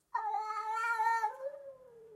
Cat Screaming #5
A cat screaming
animal, cat, meow, pet, screaming